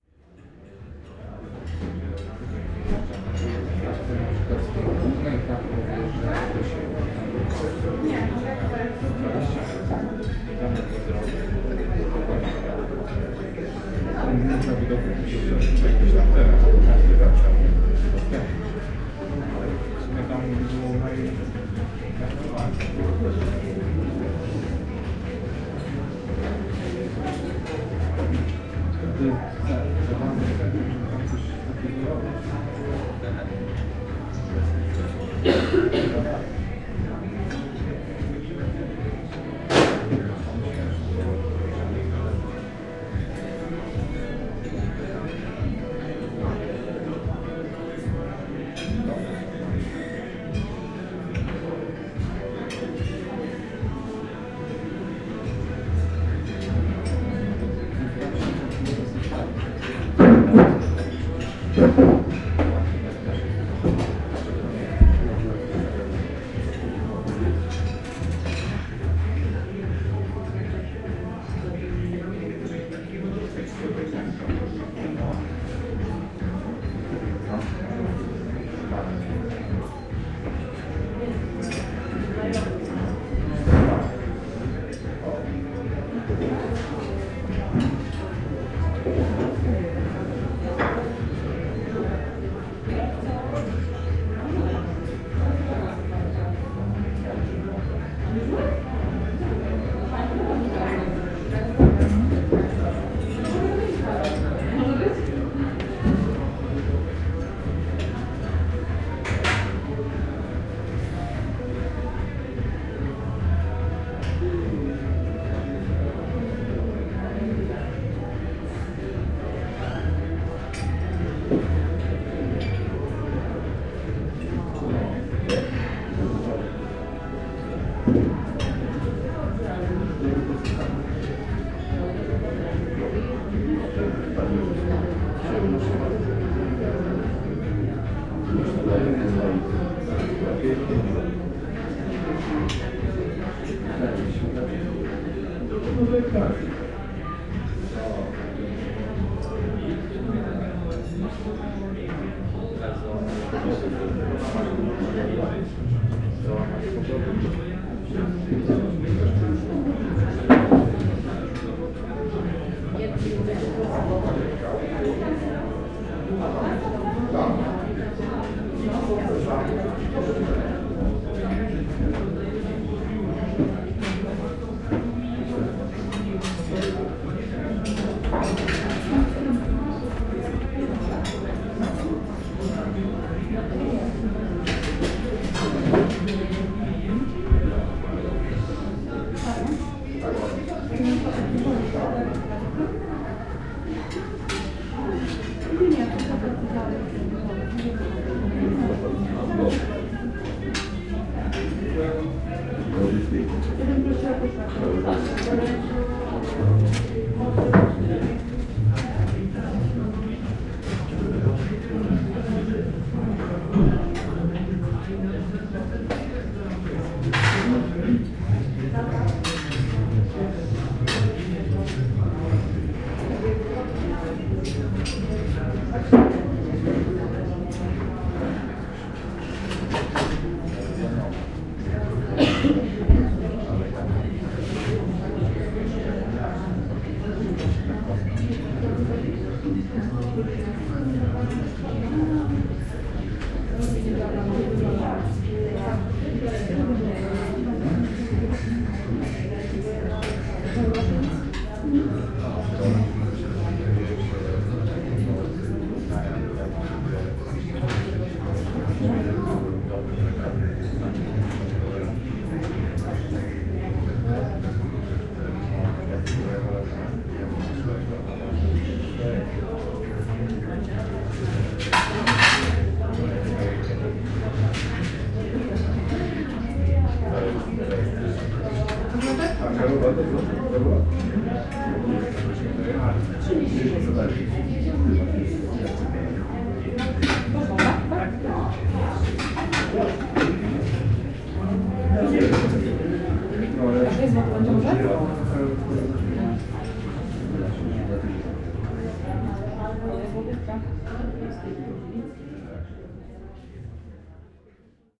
14.09.15 zajazd chrobry restaurant
14.09.2015: around 16.00. Zajazd Chrobry restaurant in Torzym (Poland).